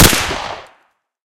SMG Firing 04
SMG Firing
Created and Mixed in Mixcraft 7 PRO STUDIO
gun, guns, shooting, shot, weapon